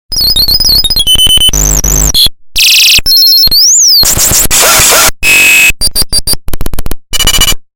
All the sounds from a circuit bent toy, high pitch
bending, circuit